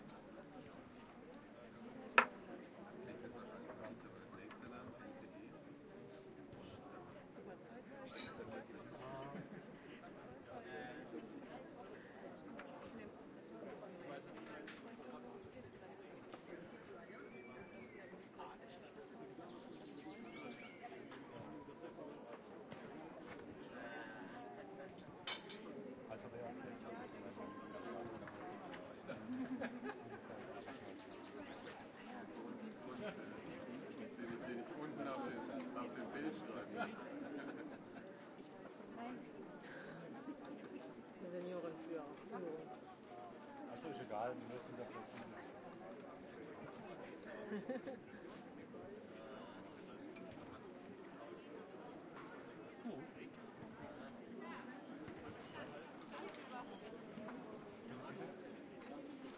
110420-001 openair cafe karlsruhe Schlosscafe
Afternoon in the Karlsruhe Palace park. People having lunch in an open air restaurant by the palace. Sounds of conversation, dishes, laughing, relaxing atmosphere. Zoom H4n